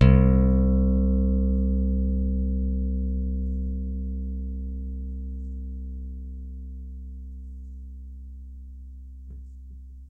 TUNE electric bass
note, pcm, bass